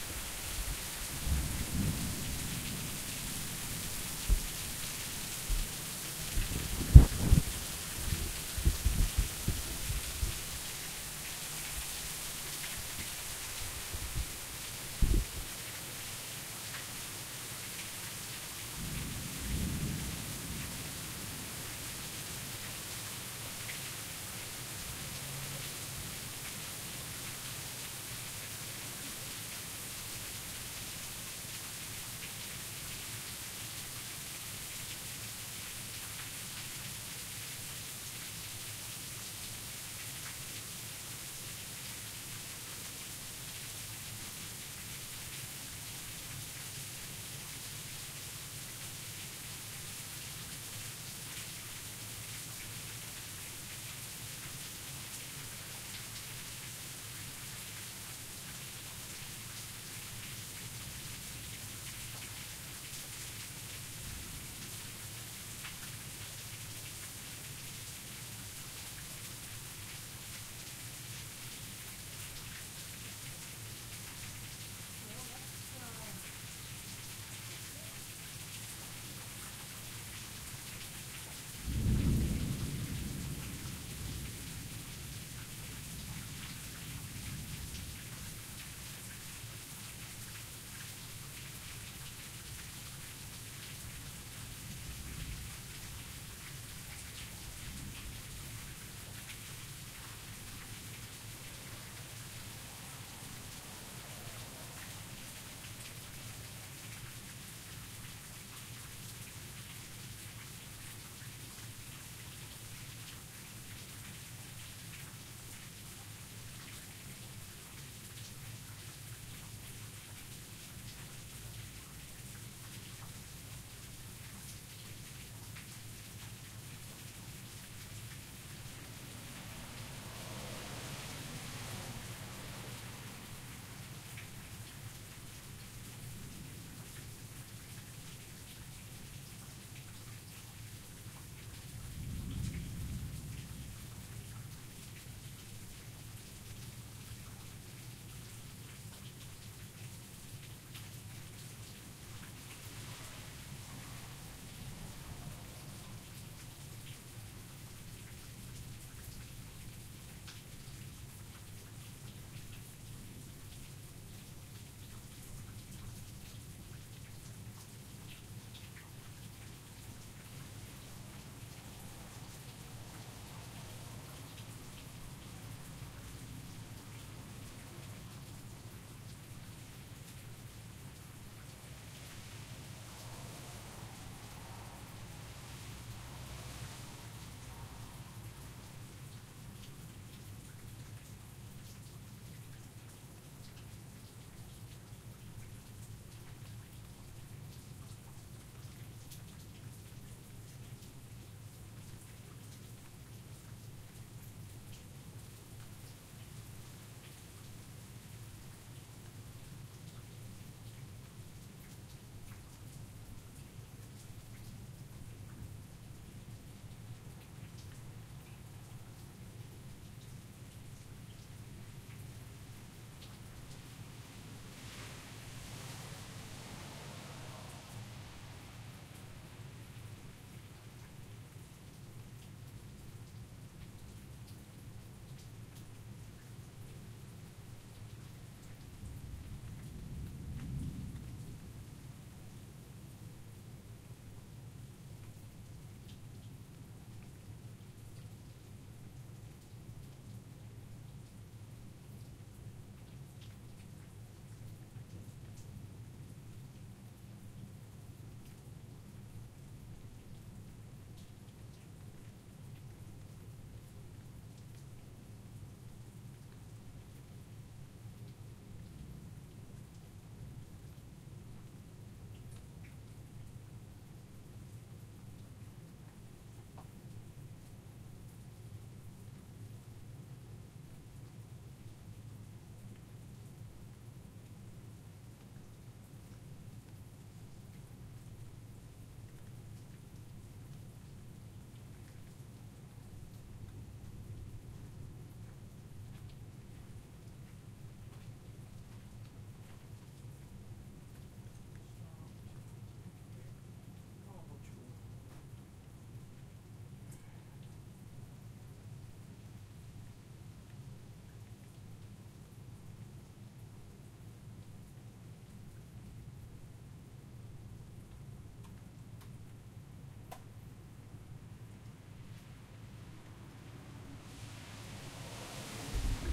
High-quality extended recording of a heavy rain storm tapering off.